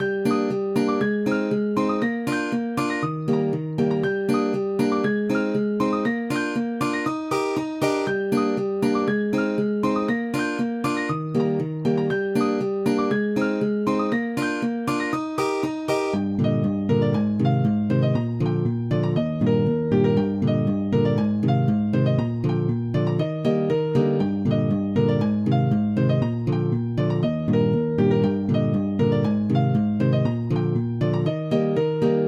Guitar with pieno melody
a chord Melody made with FL Studio starting in G major and ends in E flat
Tempo 238 BPM
using a guitar and the piano to repeat the same chords in a different way but following the melody.
enjoy.